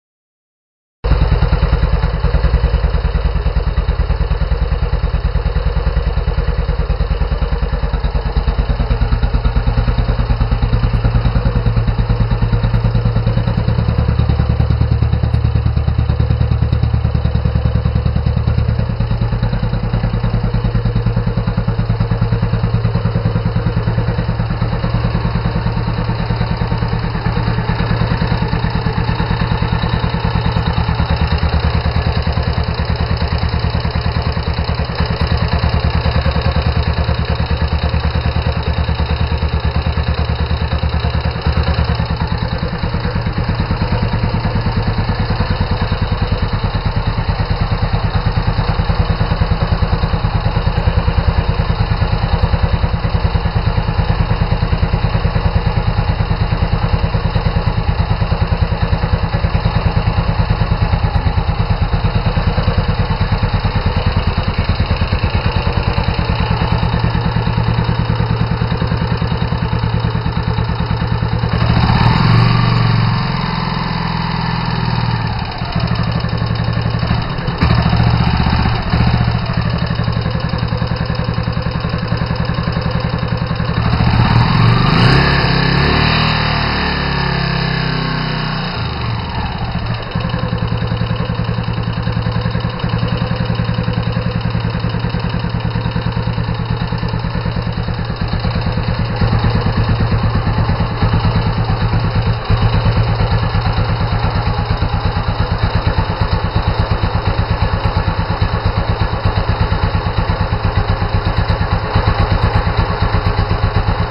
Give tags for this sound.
build; cylinder; engine; motorcycle; NSU-MAX; single

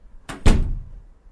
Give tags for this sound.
close; closing; door; doors; open; opening; shut; wooden

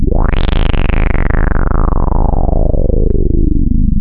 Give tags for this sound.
evil; horror; multisample; subtractive; synthesis

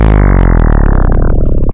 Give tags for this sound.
140-bpm; bass; pitch-shift; power-down

